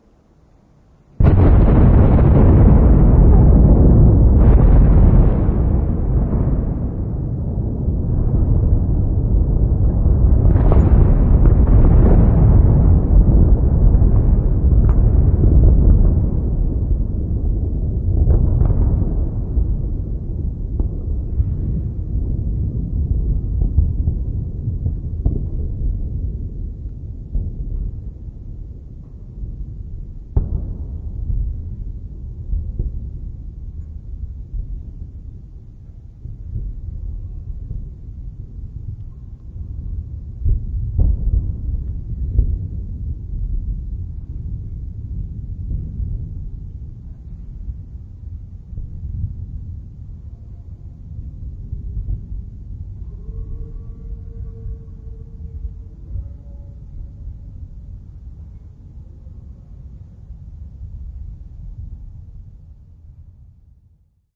the end
It's a lightning strike with pitch slowed down and some echo
bomb, boom, Campus-Gutenberg, holocaust, Physics-chemistry-mathematics, processed